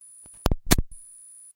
Sound of a microphone unplugged: Recorded with Rode NT3 and ZOOM H6
Ambient, Disconnect, Electrical, Experimental, Feedback, Noise, Sound-Design